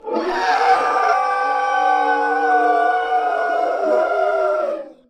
Monster wail 5
A monster wailing.
Source material recorded with either a RØDE Nt-2A or AKG D5S.